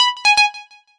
Dist SourCream
Short noise/ sound for notifications in App Development.
The sound has been designed in Propellerhead's Reason 10.
click, app, development, sounds, chime, notification, Ring